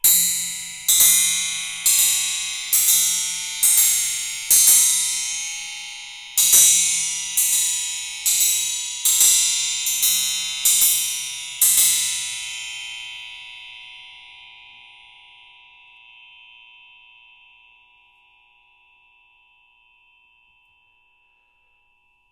Clang group 1
A metal spring hit with a metal rod, recorded in xy with rode nt-5s on Marantz 661. Hit repeatedly
clang, discordant, Metal-spring, untuned-percussion